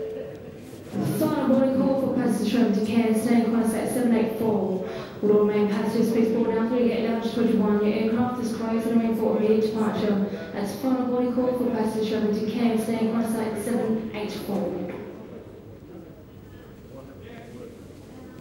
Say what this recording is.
Airport announcement. Recording chain: Panasonic WM61-A microphones - Edirol R09HR recorder.